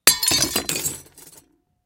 wine glass break
Wine glass broken on concrete block over plastic tub
Recorded with AKG condenser microphone M-Audio Delta AP
crash, glass, glass-break, smash